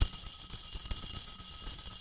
rhythmic change jar loop
bottle, break, creak, effect, film, foley, fx, glass, jar, money, movie, sfx, shatter, sound, sound-design, sounddesign, sound-effect, soundeffect, thud